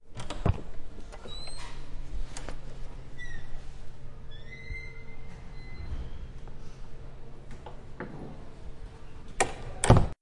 gettinIntoStairs noisyslowdoor
Open and close a creaky door.